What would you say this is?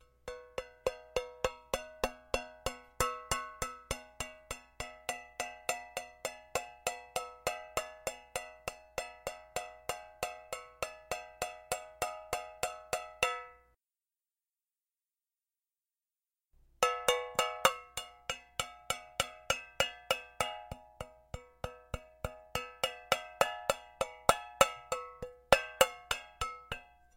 Rythmic Metal Drum
Aluminum container tapped by a finger and recorded by a Blue Yeti